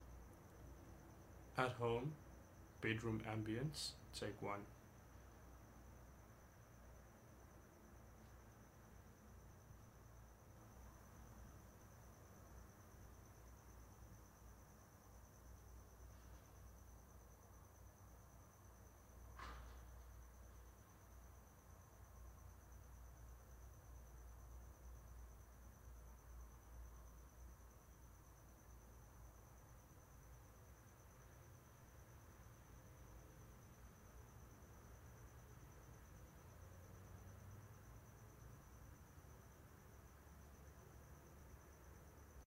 quiet bedroom ambience
bedroom, ambience